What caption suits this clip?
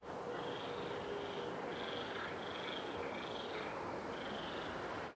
City Park Ambience At Night 1
Recording of city ambience near a park from my apartment window at night.
Processing: Gain-staging and soft high and low frequency filtering. No EQ boost or cuts anywhere else.
ambiance, ambience, ambient, Animals, atmosphere, cars, city, field-recording, Garden, Lake, Nature, night, noise, Park, passing-by, soundscape, street, traffic